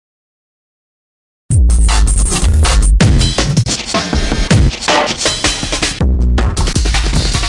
Mashed Breaks
mix of random breaks
beat, break, hardcore, heavy, jungle, mashup, processed